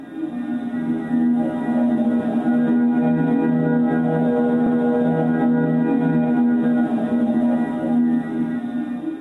pachinko-xcorr
Sound of the auto-correlation function of the recording of patchinko (slot machines) machines recorded in Japan.
field-recording, ambient, musical, japan